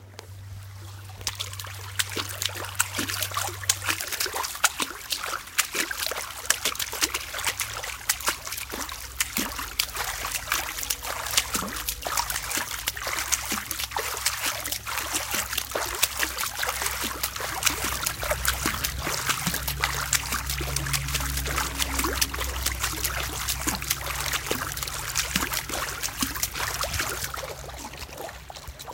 Legs splashing in flowing water 2
legs splashing in water